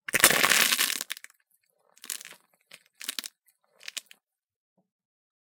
After the foot crushes the crackly thing, more pressure is applied (3 times) to achieve more crackles. This is actually a half egg-shell, but use your imagination What else might it be? See the pack description for general background.
bug, crack, crackle, crunch, crush, eggshell, egg-shells, grit, quash, smash, smush, squash, squeeze, squish